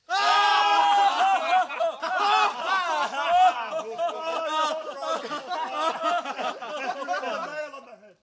Laughter cues recorded with the male members of the cast of the play "Charley's Aunt", July 2019.